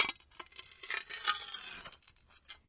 Small glass plates being scraped against each other. Glassy grating sound. Close miked with Rode NT-5s in X-Y configuration. Trimmed, DC removed, and normalized to -6 dB.
scrape, glass, noisy, plate